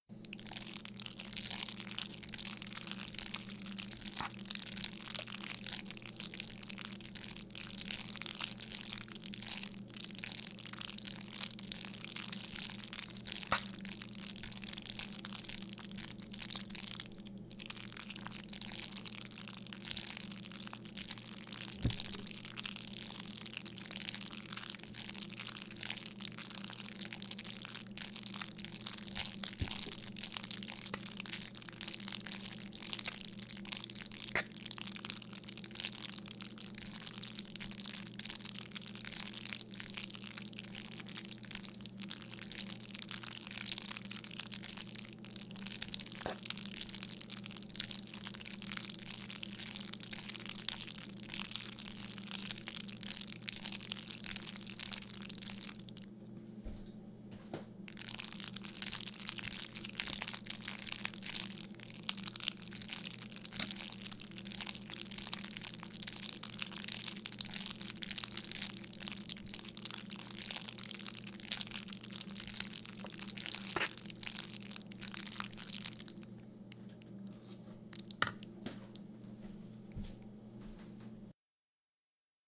This is the sound of me stirring some shells and cheese (which I delightfully consumed afterwards), in a pot. You can sometimes hear the fork scrape the bottom of the pot. Recorded with a small diaphragm CAD condenser microphone, model number CM 217, right above the pot.